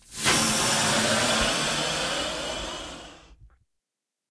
Balloon inflating. Recorded with Zoom H4